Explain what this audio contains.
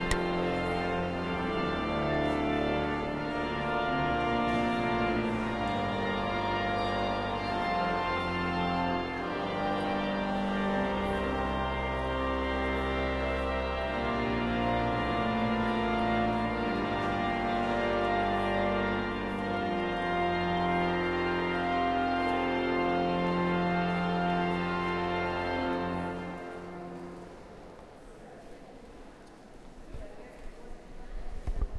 H1 Zoom. Cathedral at castle in budapest with tourists with someone playing the organ every now and then